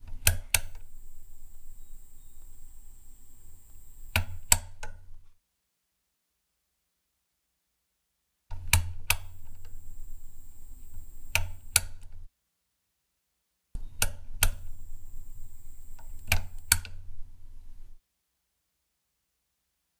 Turning a lamp switch on/off
lights, light-switch, off, lamp